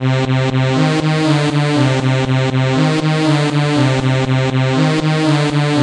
synth base
a basic synthesize sound with some rhythm in it. made in Ableton